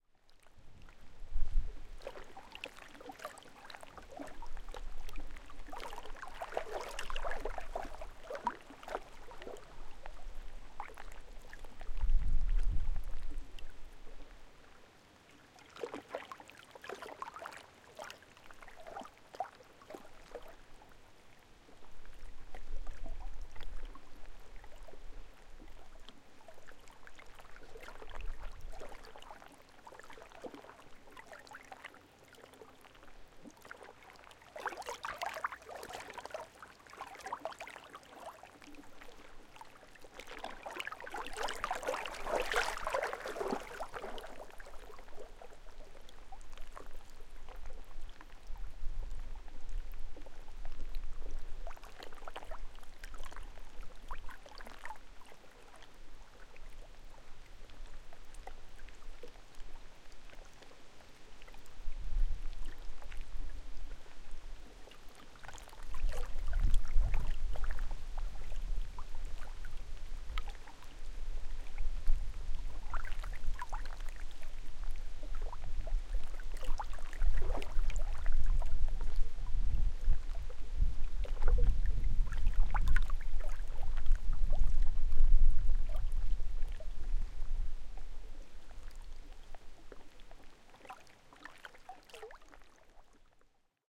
LakeWavesOct25th2015
Gentle waves lapping on the rocky shore of a lake in the Midwest. Recording made on an Autumn afternoon at 11:30 in the morning on Sunday October 25th, 2015.
Equipment: Marantz PMD-661 (*with enhanced extra quiet amplifiers by Oade Brothers Electronics of Georgia) and two Sennheiser ME66 microphones. Since it was a fairly windy fall day, I literally placed the two Sennheiser microphones on the rocks barely inches away from the lapping waves.
field-recording, nature, peaceful, waves, autumn, water, lake